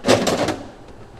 mono field recording made using a homemade mic
in a machine shop, sounds like filename--latching a different toolbox
metallic, percussion